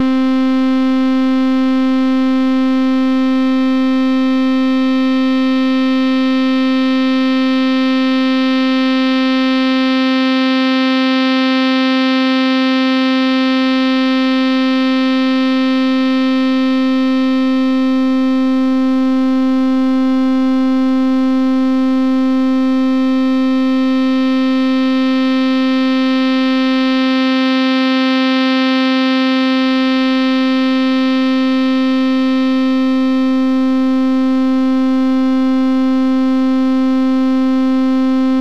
PWM experiment 1
OpenMPT, duty, sweep, electronic, pwm, wavetable, ModPlug-Tracker, synth, multi-sample